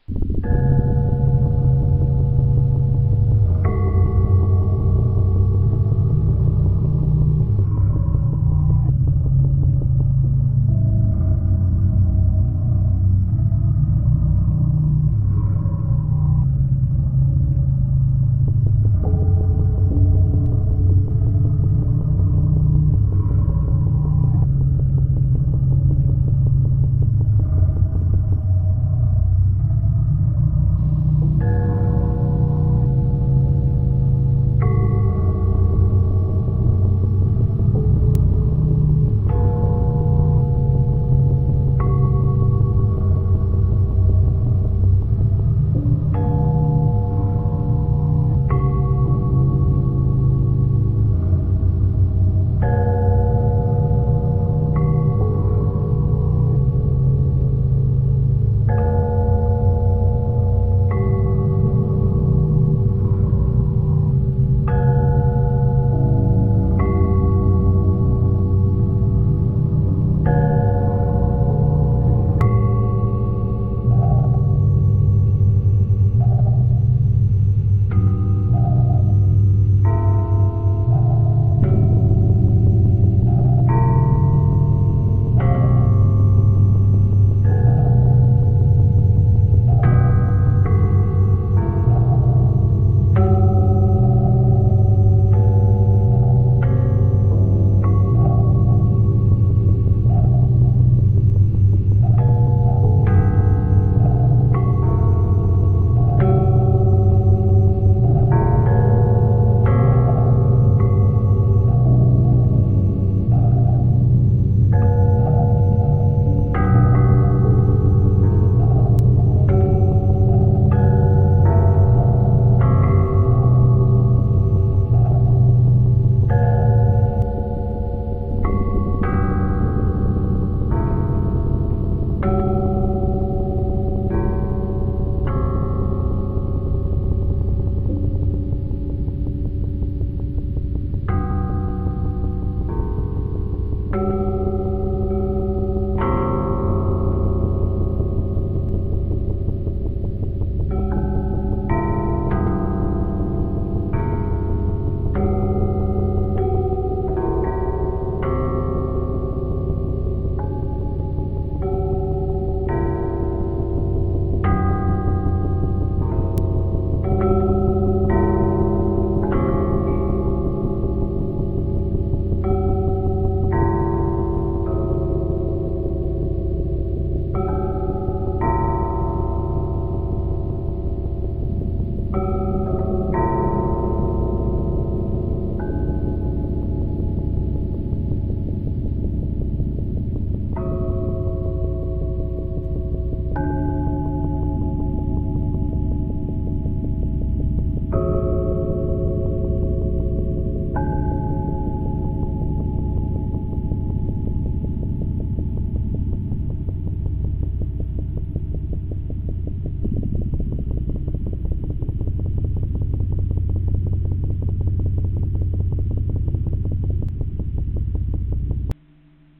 Technically, there is not anything new, just recorded the sound of a small chama, then elongated the record in a WavePad concept. By weaveing some three tracks on same timeline I got a rather enjoying product, which can be useful for the pressed romulans. The clip was sent by Intergalactic Health'nCare cente
meditation, multiclips, relaxation, romulans, three-minutes